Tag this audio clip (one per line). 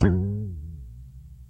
broken
experimental
guitar
note
pluck
plucked
squirrelly
string
warble